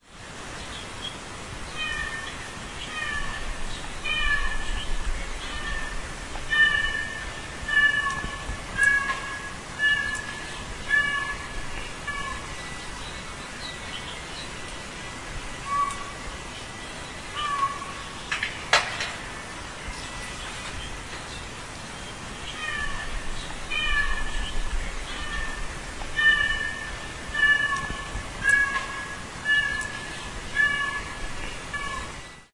courtyard miaow 180810
18.08.2010: about 23.00. some black and white cat miaowing on the tenement's courtyard. on the Gorna Wilda street in Poznan.